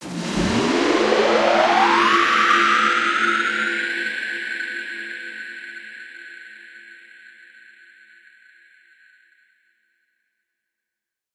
Transition,pitch-shift,distortion,positive
I created this sound to create an anime like effect with a shimmering like quality. I used FL Studio and some pith and reverb plugins to get this effect.
abstract, transition, future, woosh, fx, sound, sci-fi, effect, sound-design, reverb, sfx, soundeffect